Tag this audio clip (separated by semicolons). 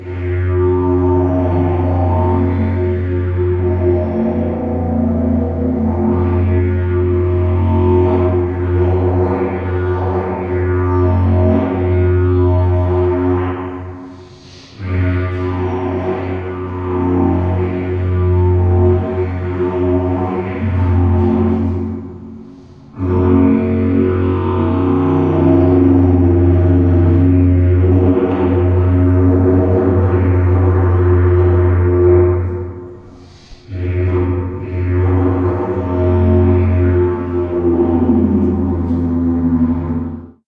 city; field-recording; lunacy